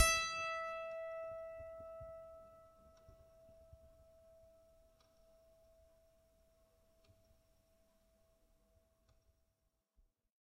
a multisample pack of piano strings played with a finger
fingered, multi